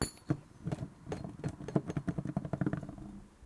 Glass object rocking
hits, objects, random, scrapes, taps, thumps, variable